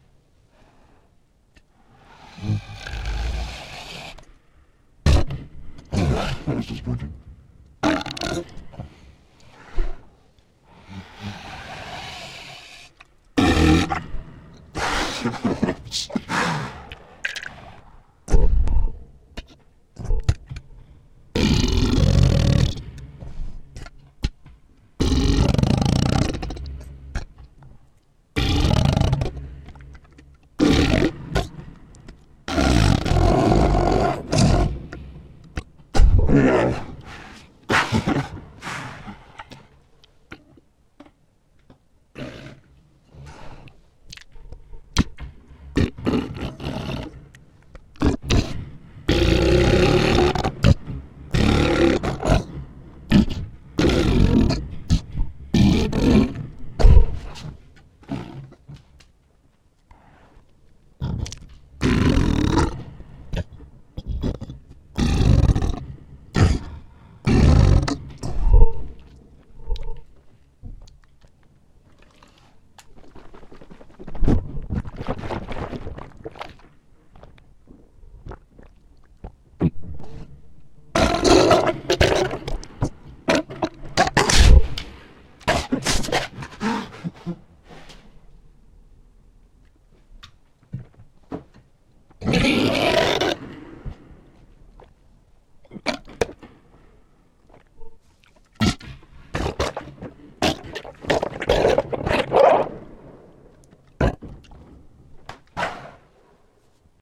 Monster belches + watery belches
Noises I made with my mouth into a Superflux PRO-268A and then heavily processed using compression, multitracking and messing around with formants and pitch.
A collection of belches and burps, with some rather disgusting watery belches at the end.
At some point in the future I'll cut these files up into smaller pieces and remove irrelevant parts.